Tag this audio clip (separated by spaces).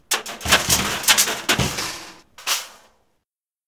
can bang 252basics crash fall collapse steel